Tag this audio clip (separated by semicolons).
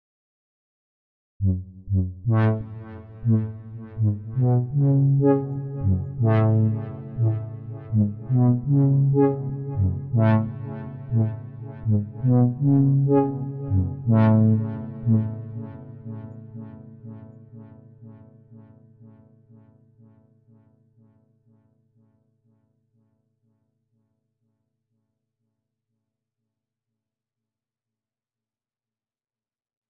Effect; Horns; Synth; Trippy